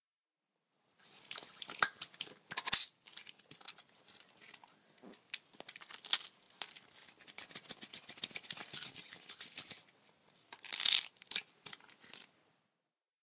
Washing hands with soap. No ambient water sound.